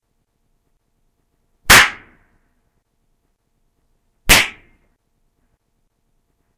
Original Cap Explosions
The original cap bangs I made by 'cap explosion' sound from.
bang, boom, cap, explode, explosion, original, raw